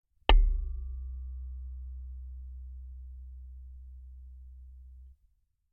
hammer on metal plate 01
A hammer hits a metal plate.
Recorded with the Fostex FR2-LE and the JrF C-Series contact microphone.